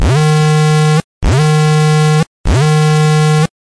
broken machine calling
sci-fi, loud, science-fiction, electromechanics, noisy, electronics